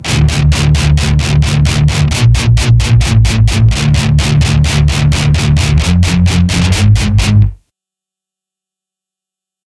DIST GUIT FX 130BPM 5.1
Metal guitar loops none of them have been trimmed. that are all with an Octave FX they are all 440 A with the low E dropped to D all at 130BPM
2-IN-THE-CHEST, REVEREND-BJ-MCBRIDE